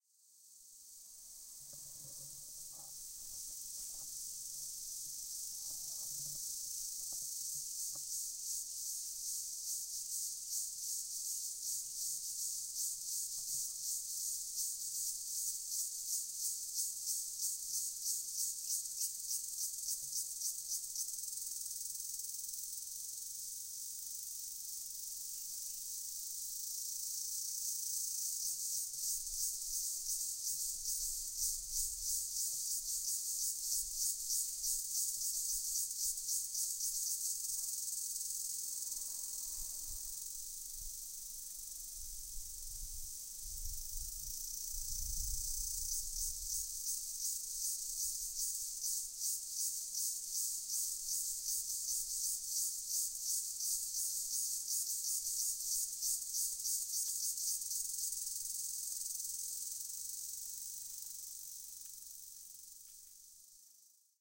Cicada-Indian Insect Sound
Indian summer insect
Nature, Insect, Summer